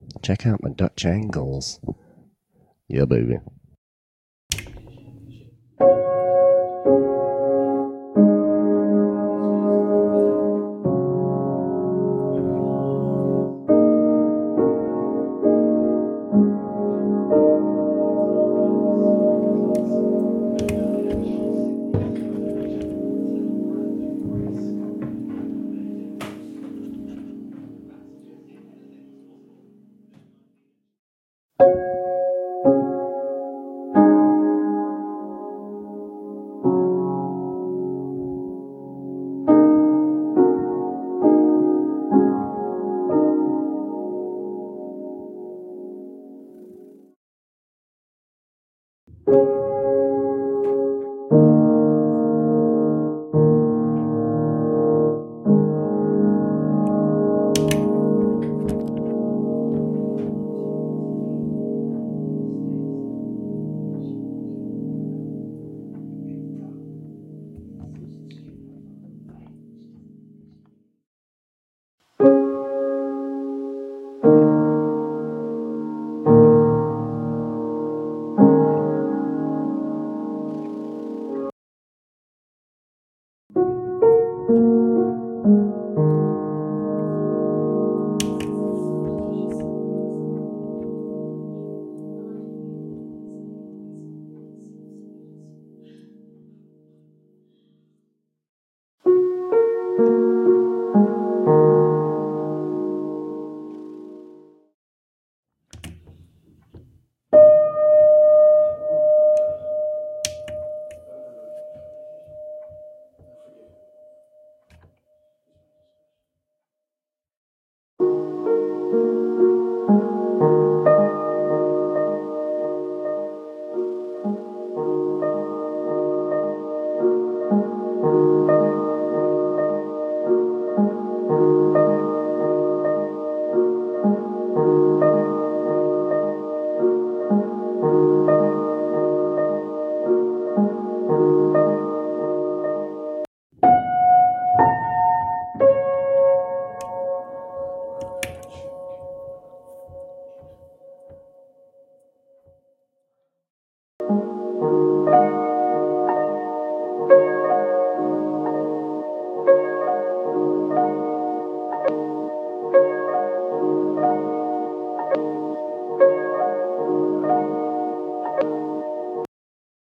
"Formatted for use in the Make Noise Morphagene. A piano which belonged to my great grandmother. This is from 1873 and sounds unbelievably lovely. Recorded with a dynamic mic going into a Doepfer A-119 and recorded by the Morphagene."
Note: we have re-formatted this sound to prevent distortion. The higher quality file will be here once it passes moderation:
field-recording, morphagene, mgreel, mylarmelodies, vintage-instrument, piano